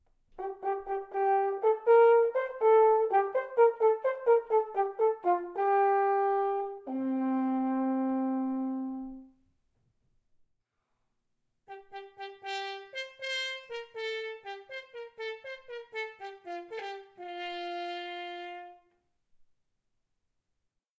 horncall bozza enforet1 Fmajor
A "call and response" theme in F major, from Eugene Bozza's solo horn piece "En Forêt." The first phrase is played open and the second is played stopped. Recorded with a Zoom h4n placed about a metre behind the bell.
F, F-major, bozza, call, call-and-response, en-foret, fanfare, french-horn, horn, horn-call, horn-solo, hunting, hunting-horn, response, solo, stopped, stopped-horn